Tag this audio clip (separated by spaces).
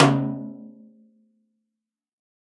drum 1-shot snare velocity multisample